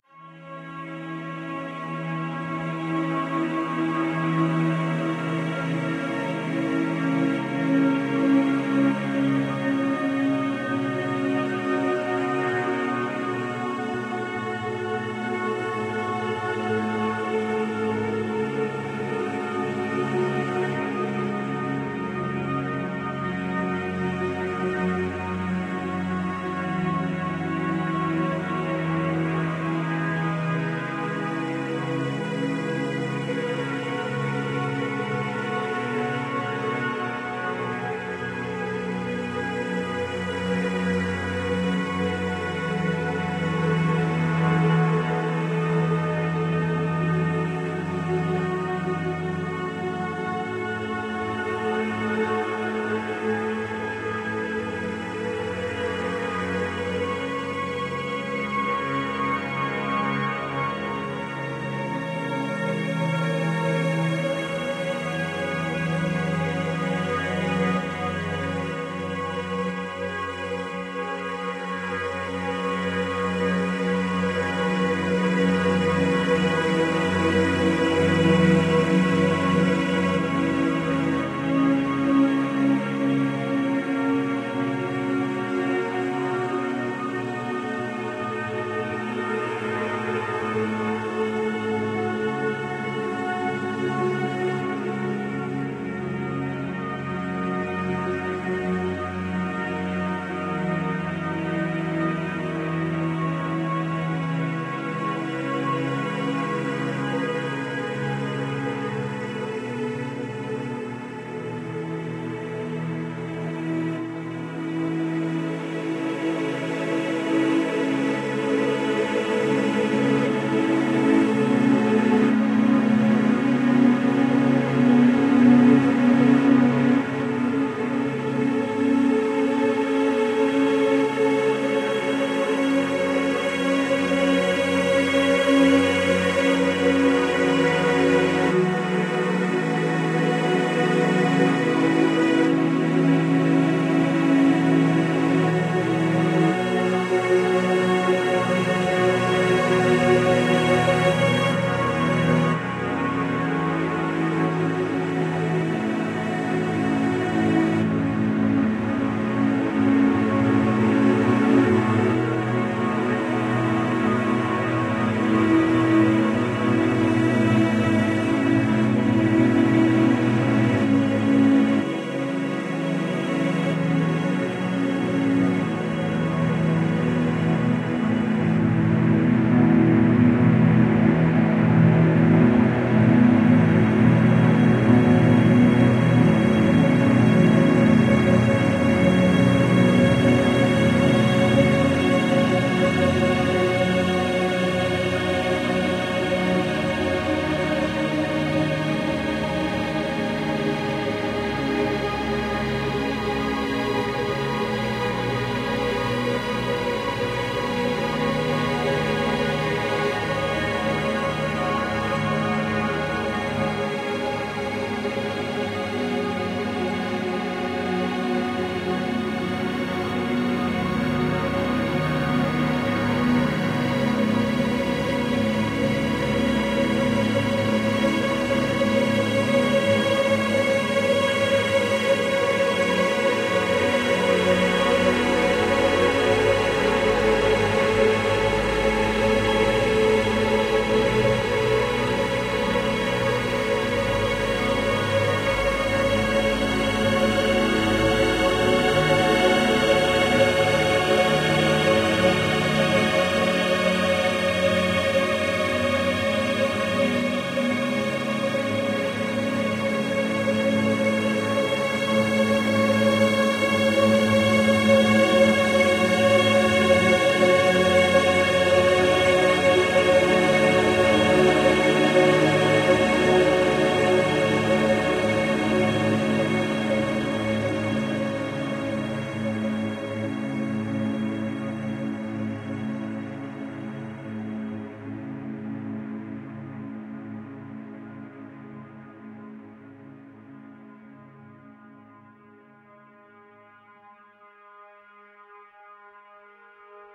A keyboard improvisation processed through Music Maker's VST cello ensemble. A very deep, tragic sound.